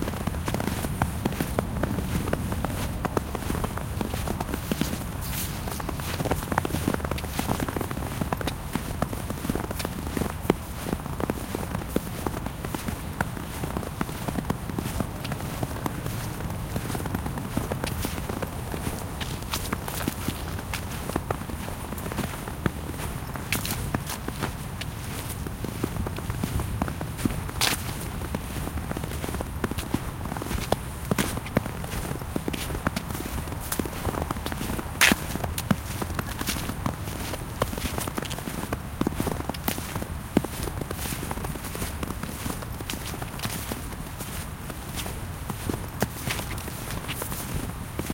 Walking Through Snow
Seamlessly looping sample of walking through fresh snow, with park ambience in the background.
feet, foot, footsteps, snow, walk, walking